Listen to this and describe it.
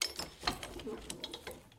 lever chains14
Good sound for a lever
chains, lever, metal